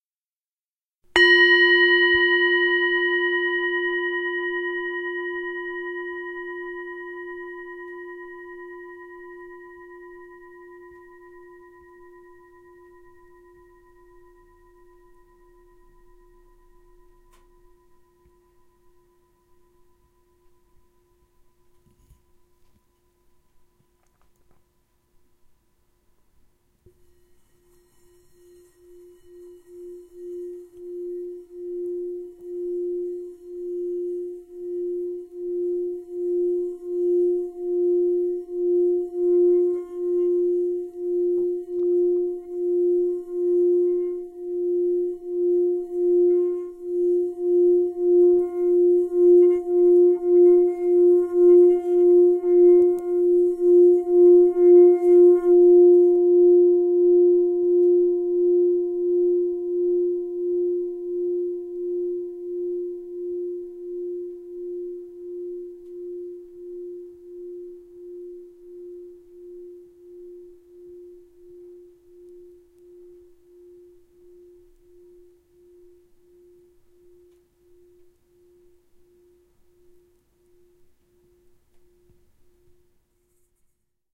Pentatonic Bowl #2
Pack Contains:
Two 'drones' on a 11 inch diameter etched G2 pitch Himalayan bowl; a shorter drone on the bass and a longer drone with both bass and first overtone. Droning done by myself in my home.
Also contains pitch samples of a 5 bowl pentatonic scale singing bowl set of old 'cup' thado bowls, assembled by myself. Each sample contains both a struck note and a droned note. Some bowls have more than one sample for no particular reason. All performed by myself.
antique, brass, himalayan, meditation, meditative, pentatonic, percussion, relaxation, relaxing, scale, singing-bowl